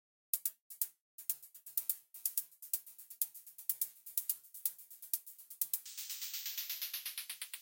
Drum Beat created & programed by me and slightly processed.Created with analog drum synthesizers with Buzz.
beat, loop